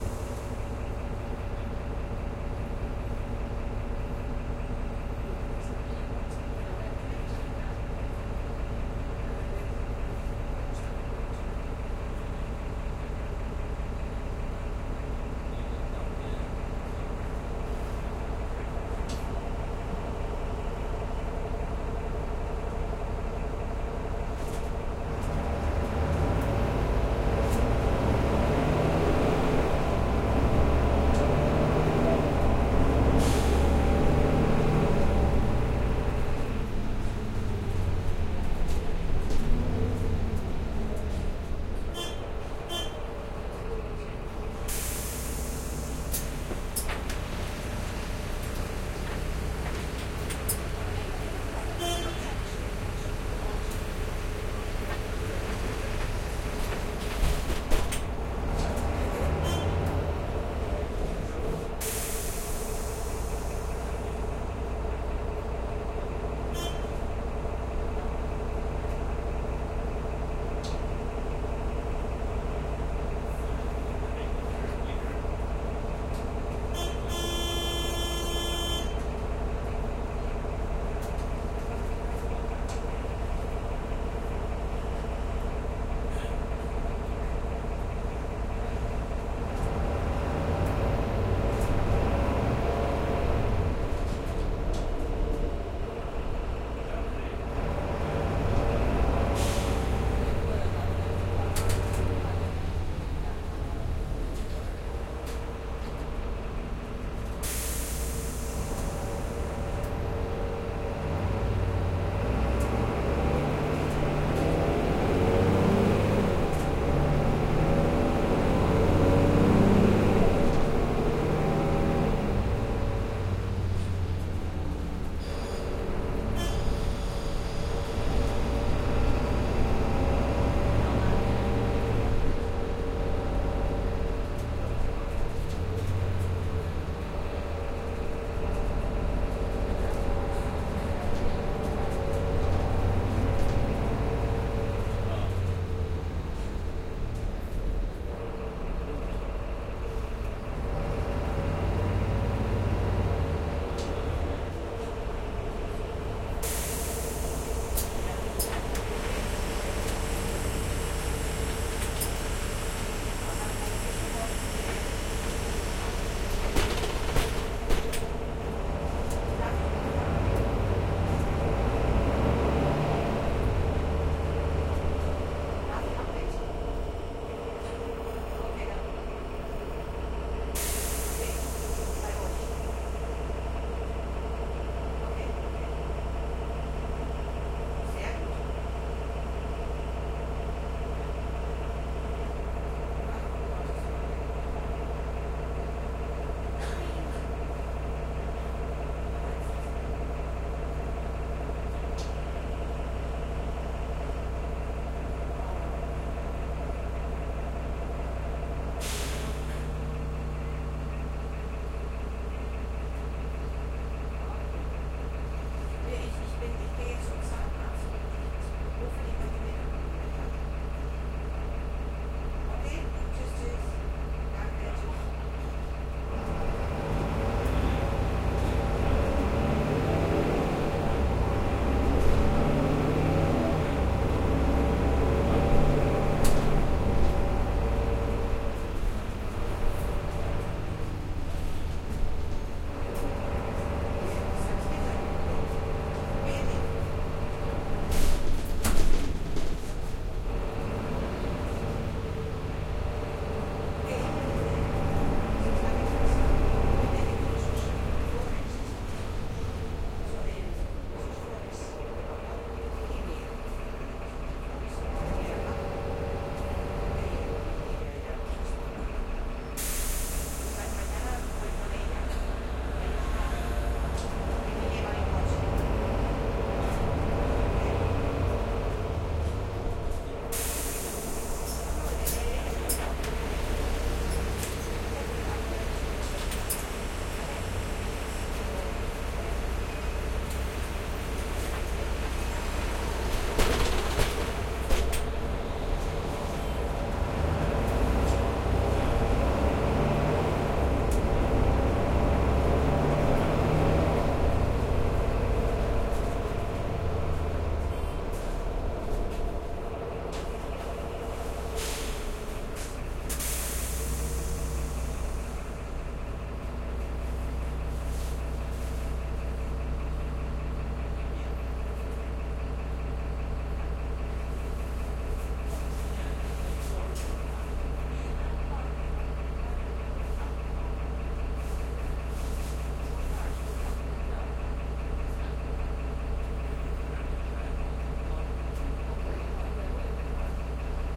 URBAN BUS BARCELONA BETWEEN MOTOR AND REAR DOORS
Urban Bus of Barcelona rec. back side between motor and doors
ZOOM H6 ms mic
Barcelona, bus, interior, public, TMB, transport, transportation